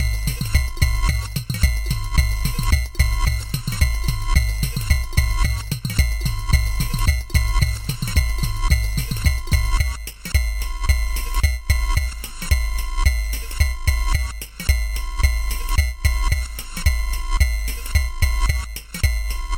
Flowers Simplistic Beats 4
The same beat processed with fx in Audacity.
cute, hip-hop, beat, clave, 808, wtf, funny, lo-fi, surreal, weird, loop, odd, roland, little, simple, rap, cowbell, freaky, breakbeat, minimal, funky, claves, 909, peculiar, rythm, eccentric